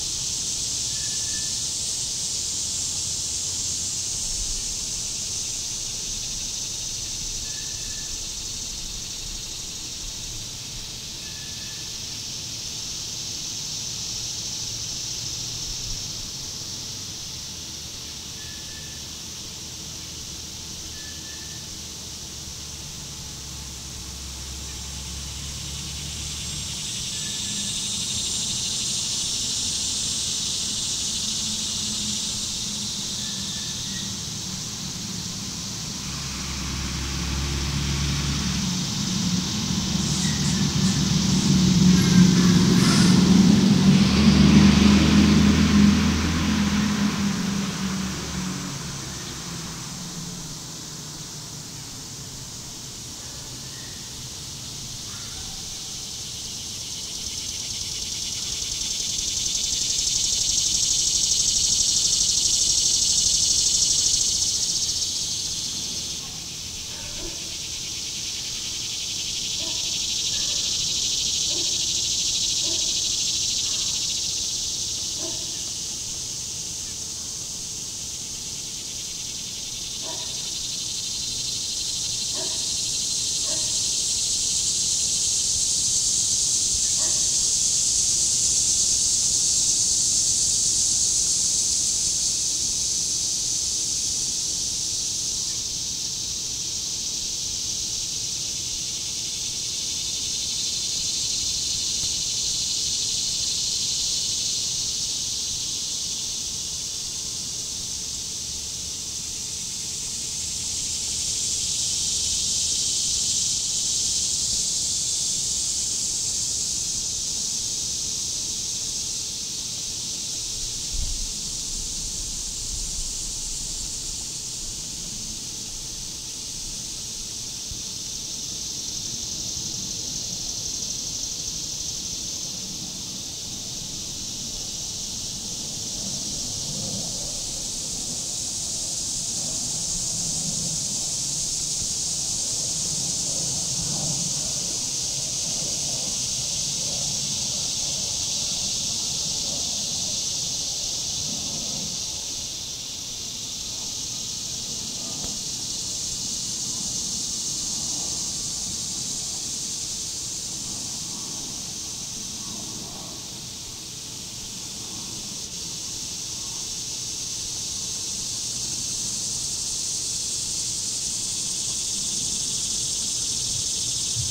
Very noisy cicadas in my front yard. No mechanical noises except for a truck driving by about 35 seconds through. Some birds chirping and dogs barking in the background.
bird, cicada, dog, field-recording, outdoors, outside